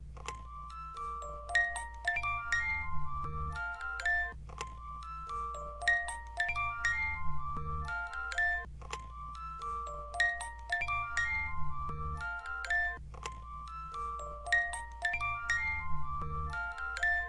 ambienta-soundtrack musicbox-JFBSAUVE dreamy
It's designed to be mixed in a dreamy musical interlude, while an actor recites a monologue about knowledge, consciousness and renaissance.. and abstract visions slides projected on a tower five meters high, placed like fulcrum of the space-scene. This is part of a soundesign/scoring work for a show called "Ambienta": an original performance that will take place next summer in Italy. It's an ambitious project that mix together different artistic languages: body movement, visions, words.. and of course, music and sounds. Maybe useful for someone else.
abstract, children, chill, chill-out, chillout, cinematic, dream, dreamy, float, floating, loop, music-box, musicbox, rebirth, relax, remix, sweet